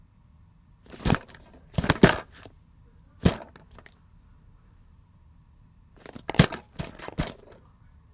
Picking up stacks of DVDs and individual DVDs